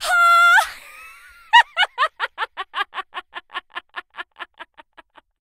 smiech kobiety - laughing women 01
laughing women, mic - studio projects b1